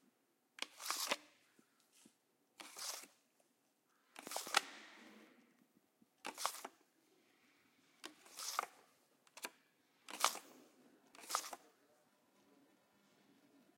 Subway station, card swipe
Ticket machine in NYC subway, card swipe